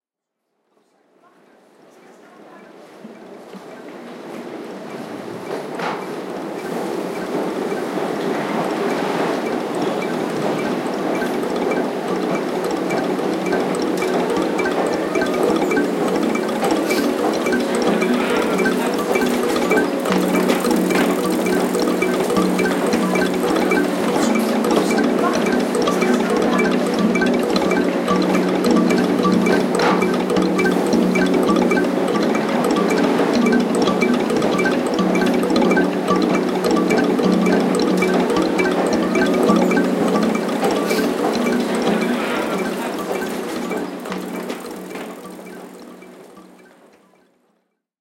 Mixture of a recording at a train station (Utrecht Centraal Station, The Netherlands) and the playing of a kalimba. Recorded with a ZoomH1.